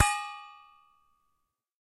Percasserole rez C 4 mf
household, percussion